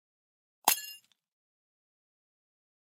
Breaking Glass 01
break, breaking, glass, shards, shatter, smash